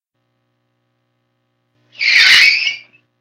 Sonido de frenos de un carro